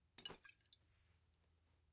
Grabbing a glass bottle full of liquid.
full, glass, liquid, grab, bottle, grabbing